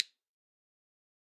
Drumsticks [Dave Weckl Evolution] muted №2
shot, Vater